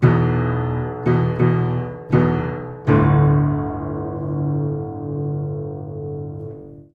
Playing hard on the lower registers of an upright piano. Mics were about two feet away. Variations.